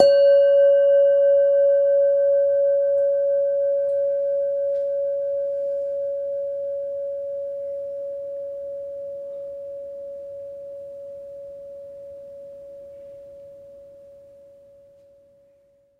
Semi tuned bell tones. All tones are derived from one bell.